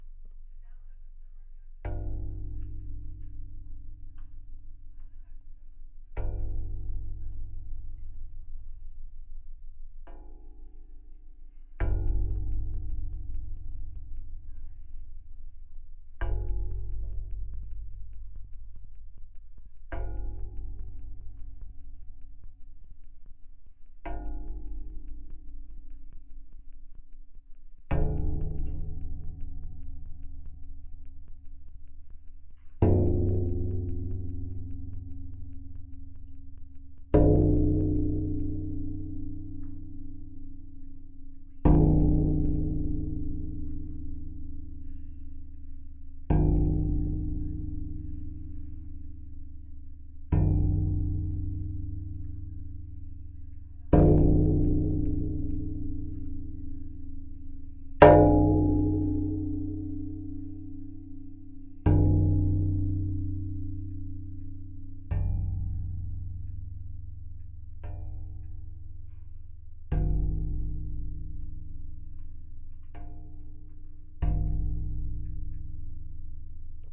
Striking sheet metal held in a clamp. Some of the strikes contained considerably more bass than others.